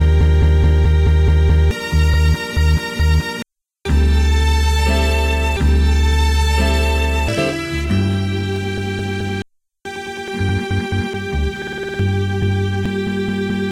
Bend Right (140 bpm)
Tape music, created in early 2011